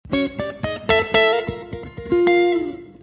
Jazz guitar #5 109bpm
A chromatic line of octaves, could be a Bb blues line
guitar, octaves, jazz